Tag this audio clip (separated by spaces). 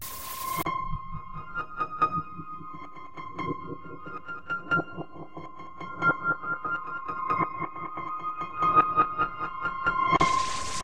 dark eerie electronic loop sequence